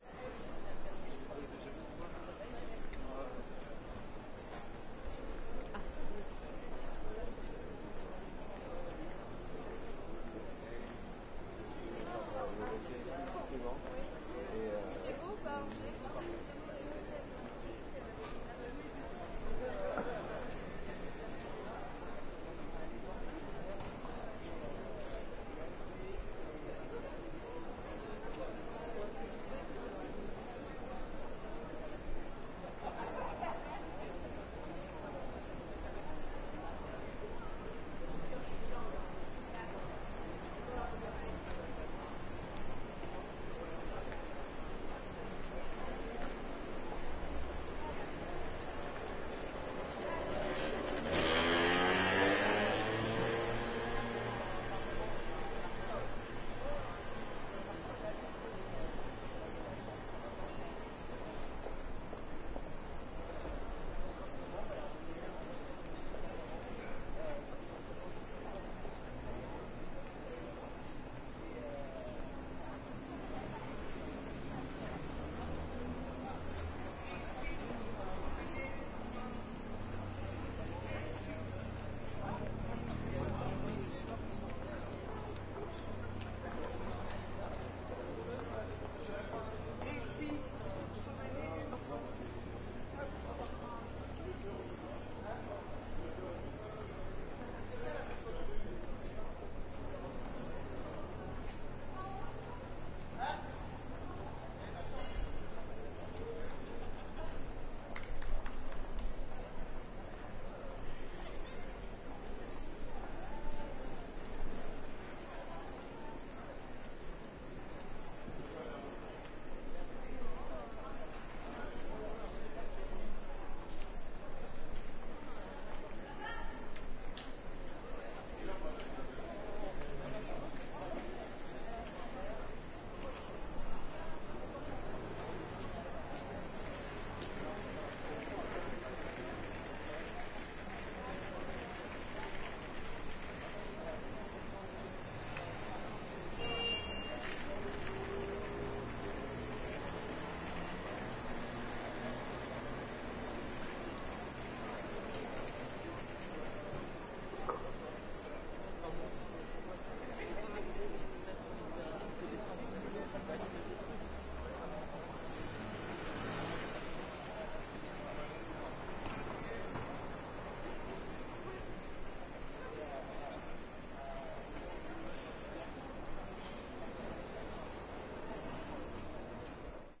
Street of Toulouse, France.